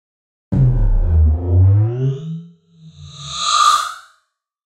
BASS DRUM BECOMES ALIEN. Outer world sound effect produced using the excellent 'KtGranulator' vst effect by Koen of smartelectronix.
effect, fx, horror, processed, sci-fi, sound, sound-effect